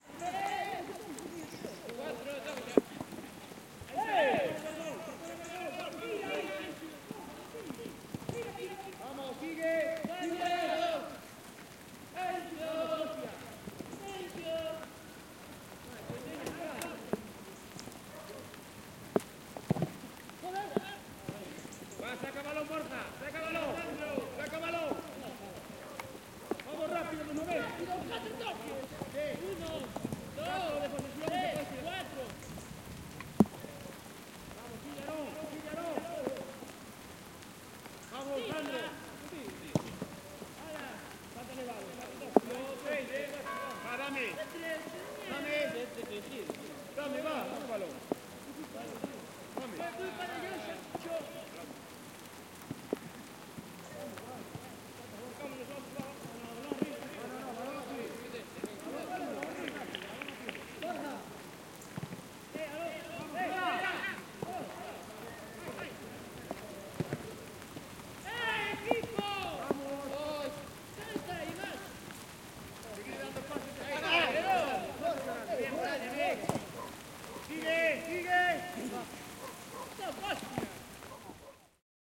entrenamiento futbol bajo lluvia
football training under the rain
h4n X/Y
football, rain